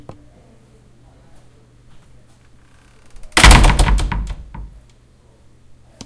College door slam
a more aggressive closing of E203's door
shut, wooden, door, slam